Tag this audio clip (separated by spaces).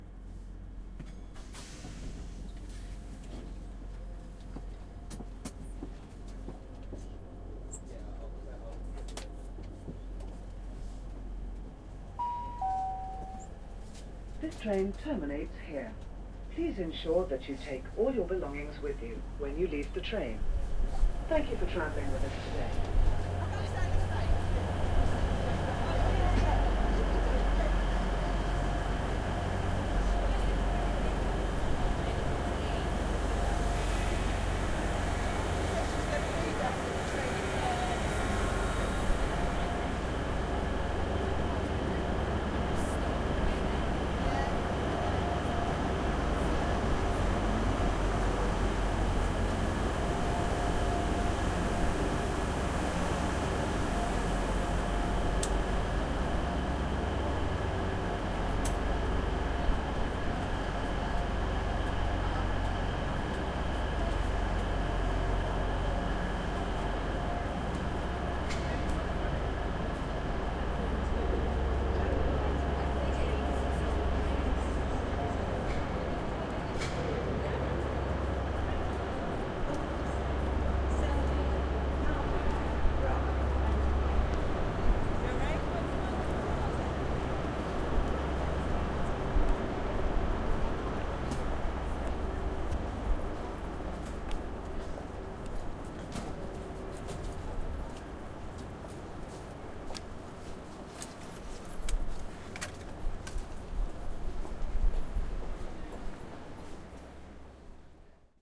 out station train walking